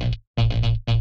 bass f e dd 120bpm-05
bass, club, compressed, dance, distorted, dub-step, effect, electro, electronic, fx, house, loop, rave, synth, techno, trance